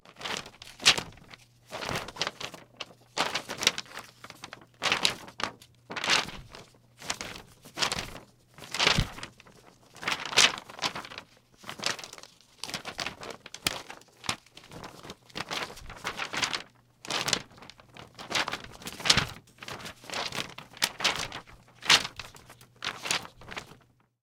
paper sheets handling flapping grab pick up
handling, paper, sheets